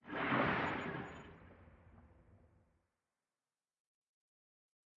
Fulfilling a user request for "underwater swishes and swooshes".
I initially planed to use some of my own recordings and even recorded some sounds for this purpose. The mixing was done in Ableton Live 8, using smoe of the built in effects (like EQ and reverb).
The sounds used are listed below.
Thanks to the original creators/recorders of the sounds I have used.